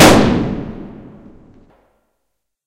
I made this sound by layering a bunch of different balloon popping sounds.